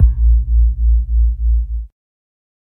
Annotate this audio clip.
808 double bass (21)A#
A, Note, BASS